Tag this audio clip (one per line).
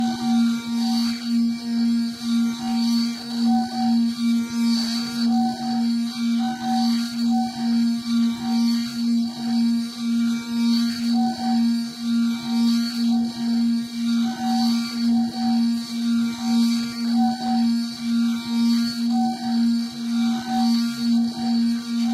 clean
drone
glass
instrument
loop
melodic
note
sustained
tone
tuned
water
wine-glass